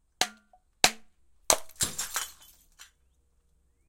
Hammer and Bottle Smash FF200
Hammer tings glass 3x, shatters bottle, liquid, glass breaking and falling.
breaking-glass, smashing-glass, hammered-glass